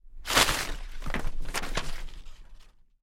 Paper being thrown into the air with a full sound.